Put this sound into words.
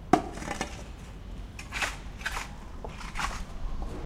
On a construction site someone is using a shovel to mix sand with
cement, scraping the shovel on a concrete floor. Unprocessed field
recording.
construction, environmental-sounds-research, field-recording, scrape, scraping, shovel